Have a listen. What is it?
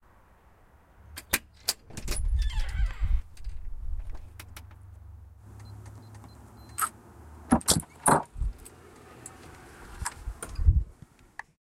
This is a recording of 4 digit number lock entrance from a medium traffic street.